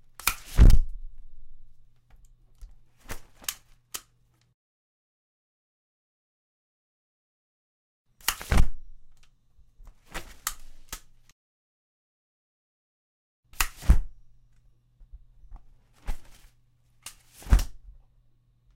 open and close umbrella
opening and closing an umbrella three times.